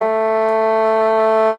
fagott classical wind